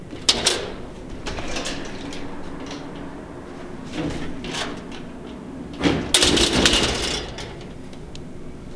Heavy Metal Door (Far Away)
Away, Door, Far, Heavy, Metal